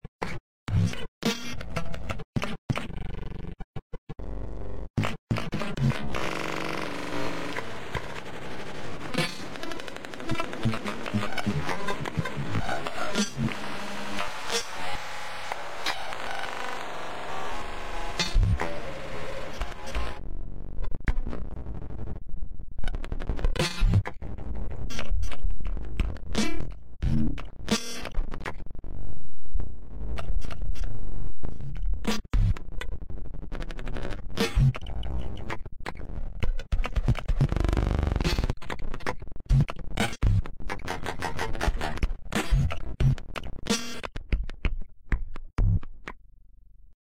One in a small series of weird glitch beats. Created with sounds I made sequenced and manipulated with Gleetchlab. Each one gets more and more glitchy.